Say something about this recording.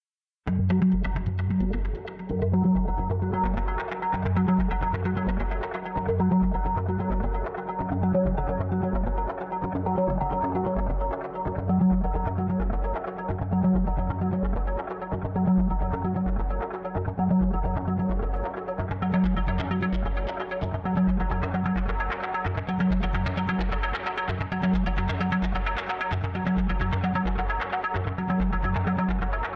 Another morphed piano in ableton with arppeggiator.